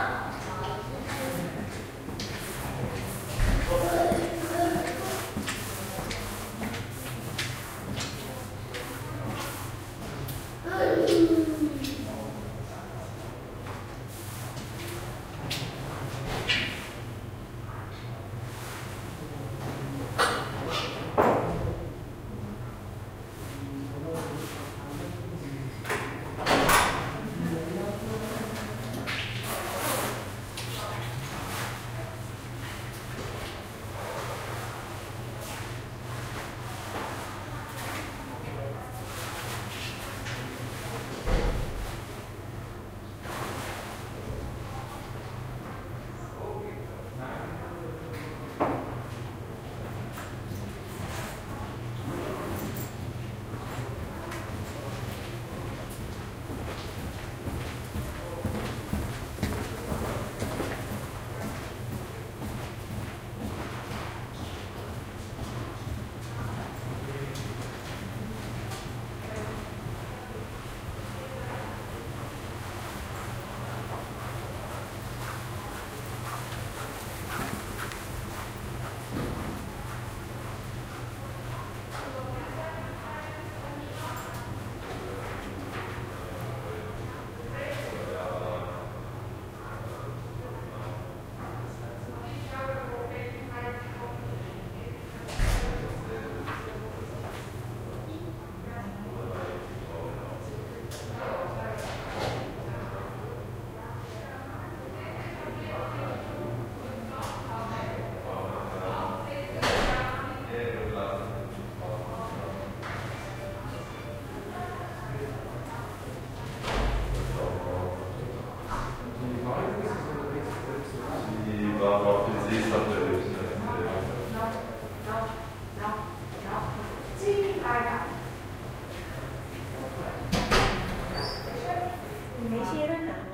Recorded in a City hospital corridor.
Sony PCM-A10